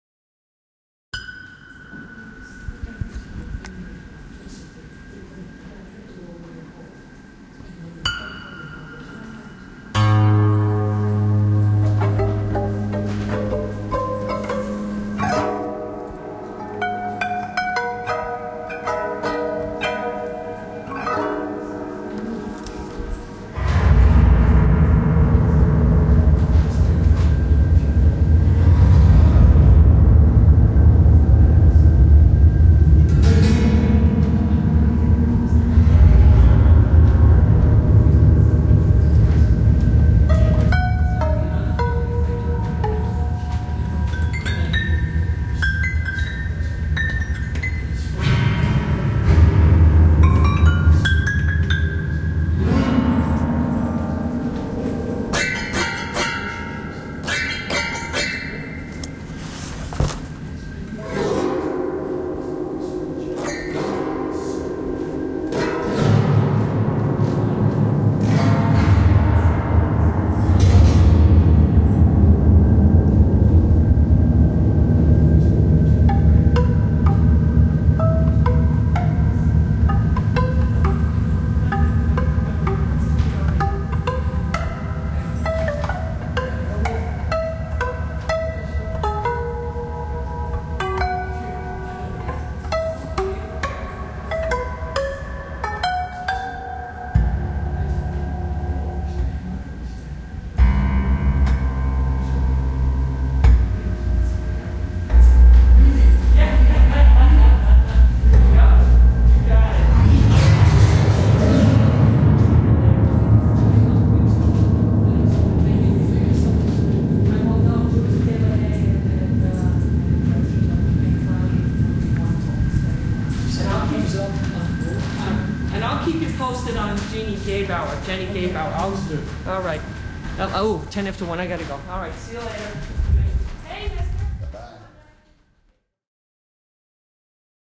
Having just fijnished re-stringing a 1906 Steinway 'B' for St. Peter's Episcopal Church, Morristown, New Jersey,USA, I was admiring the low-frequency response I sensed while putting in the bass strings, which are the final stages of such a re-stringing. Since i am inclined to improvise musically in ANY situation, I quickly activated my ZOOM recorder (you can guess at which part of the recording I risked re-positioning the recorder) and began to hold forth. Feels like a possible Calvin-and-Hobbs sound track.--PM